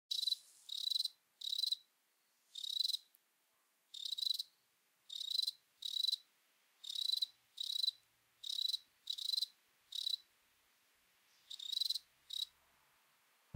170603 clean cricket solo trill
solo cricket in the night. recorder Tascam DR100mk3, mic Rode NT4, postprocess iZotope RX
ambiance, ambience, ambient, bugs, cricket, crickets, field-recording, insect, insects, nature, night, summer